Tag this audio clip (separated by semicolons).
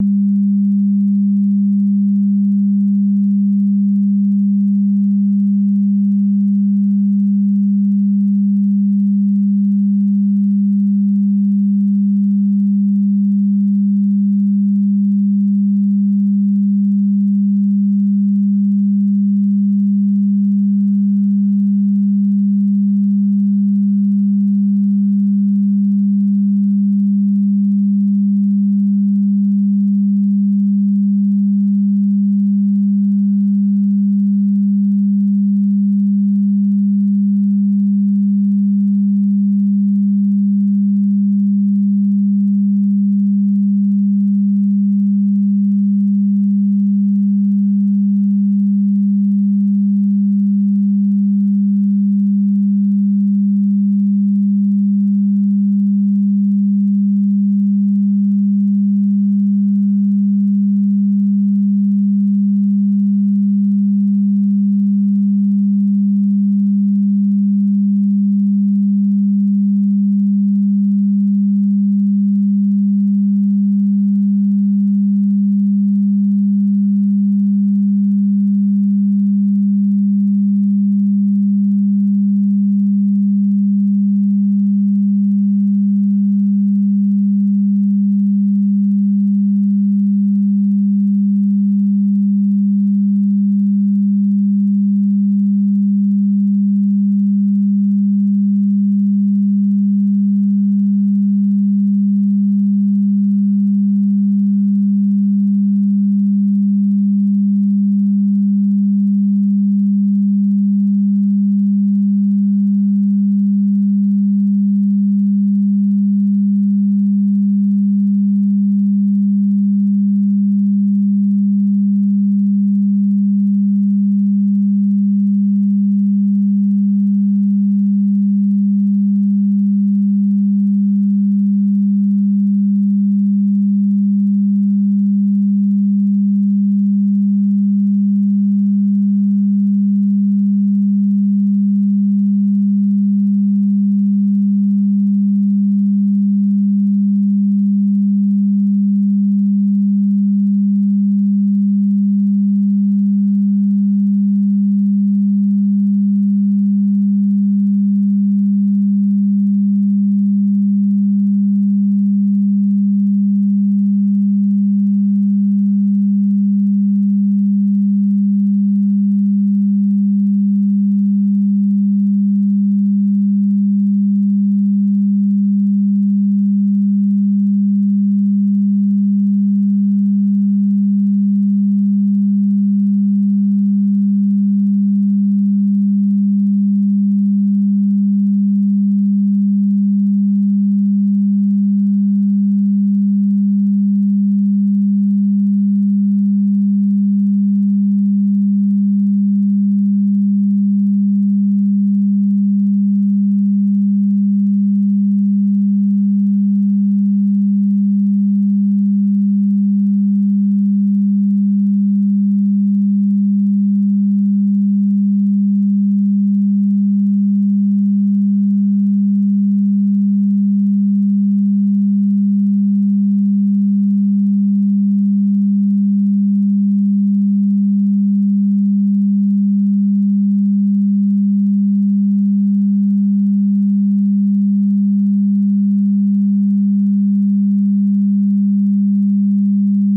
sound; electric; synthetic